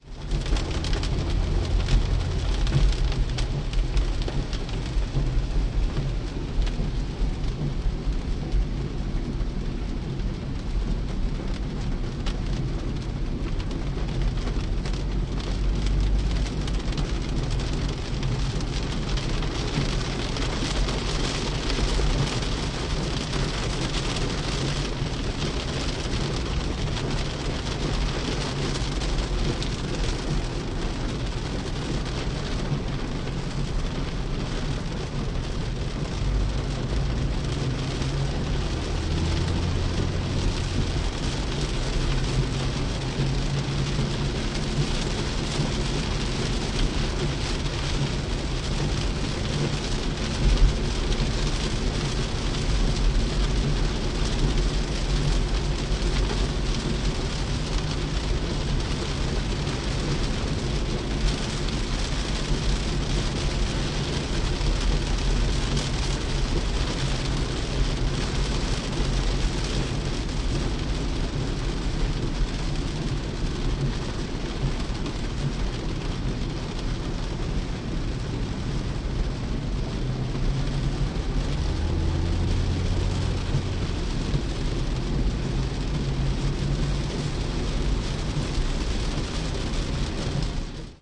INT CAR RAIN HIGHWAY F
Recorded with H2 in surround mode with a corresponding Front and Rear file. Highway rush hour driving during a rainstorm with windshield wipers. Honda Civic 2001 in stop and go traffic.